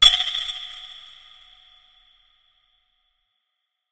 microphone + VST plugins
effect, fx, sfx, sound